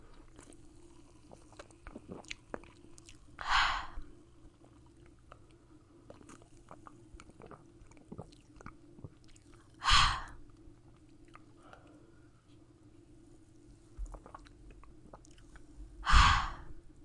Soda/Pop Drinking Sound
Sound of Drinking a Pop/Soda Can, can also be used for drinking water and sigh sounds.
beverage, can, coca, coke, cola, drink, drinking, fizzy, fresh, juice, liquid, sipping, soda